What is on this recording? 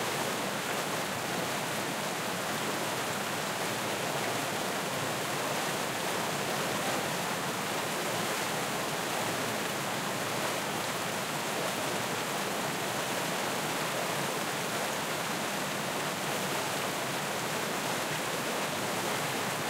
Water vortices
Atmosphere in a amusement park.
amusement, thrill